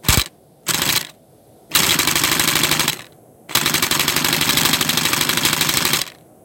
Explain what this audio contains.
Machine gun #1 (Pie's War Sounds)
The sound of a fast-firing submachine gun, made using a motorized electric piston. Pew pew pew pew pew!